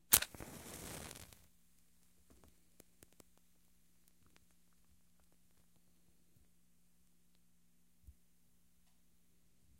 The strike of a match and letting it burn a bit.
strike, fire, start, match